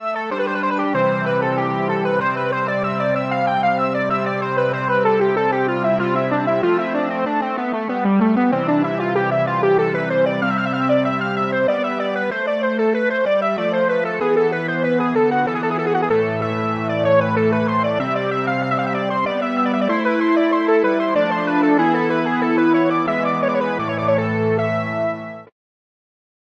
Preset 24 Dimensional Sawtooth, lead sample of Alphatron Oscillion, a polyphonic subtractive synthesizer in VST, VST3 and Audio Unit plugin format for Windows and Mac.